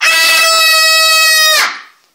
woman scream
Woman stress scream recorded in the context of the Free Sound conference at UPF
666moviescreams, voice, human, woman, grito, female, scream, screaming